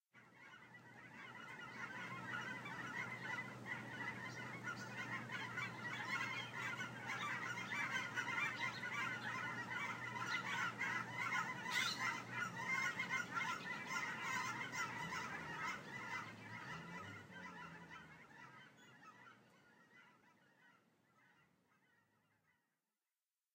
A large group of Canada geese overhead flying south for the winter.